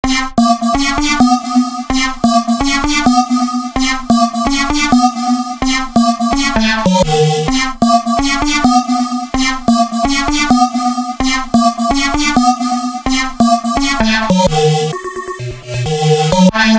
Sound of a champion